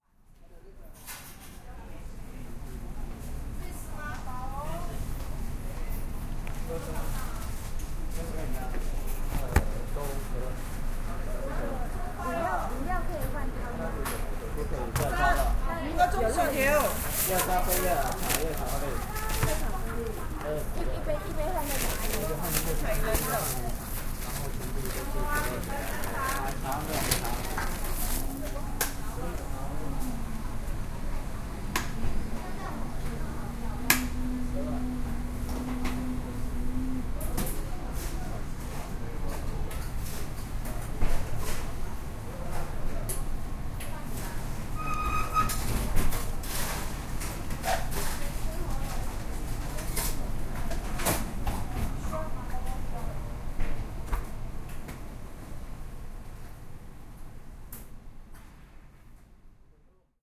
Soundscape Field Recording for the Music and Audio Module 2014, in the Communication & Media Program at the University of Saint Joseph - Macao SAR, China.
The Students conducting the recording session were: Leonardo Oliveira, Jessica Lo, Joana San Jose